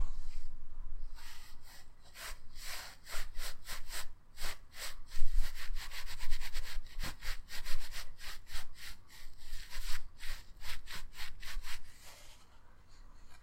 Tied up and struggling
Tied my wrists together and struggled to get out near the microphone.
bind, bound, escape, rope, struggle, tied-up